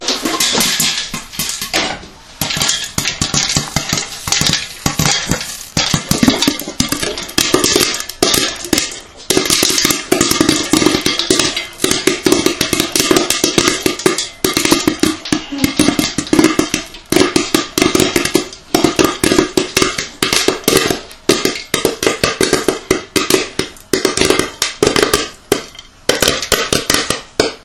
Pop Corn popping in a stainless steel pot.